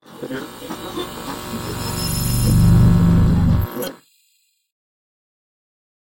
radio shudders21x
grm-tools, radio, shudder, sound-effect